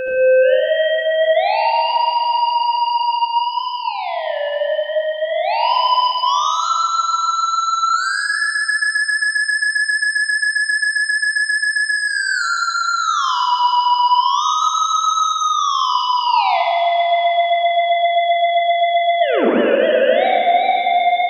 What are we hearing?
theremin3verb
Added some verb.